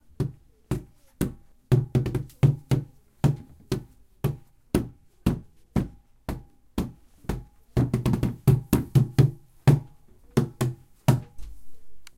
SonicSnap GPSUK Group1 Drumming
cityrings, galliard, sonicsnap